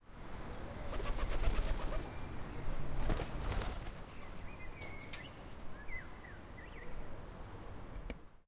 Bird Wings
Pigeon Wings next to mic